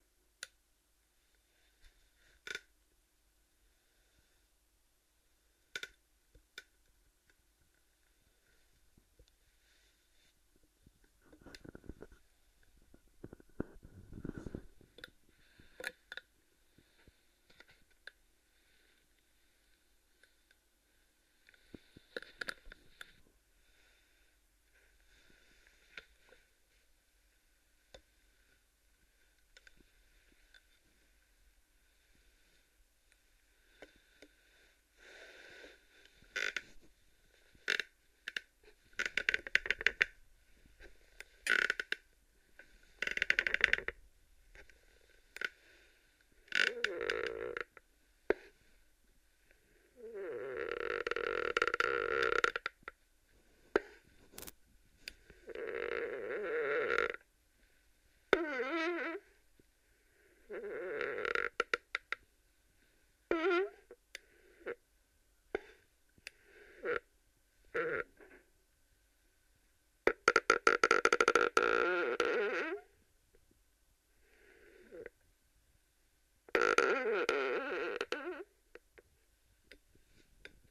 chair squeek
A chair squeaking